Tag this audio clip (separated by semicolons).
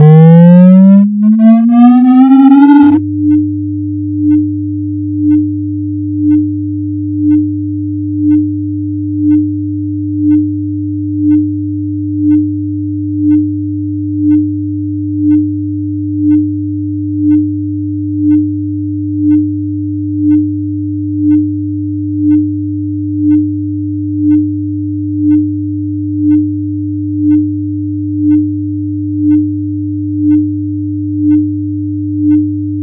Car; Hover; High; Sci; Future; Tech; Fi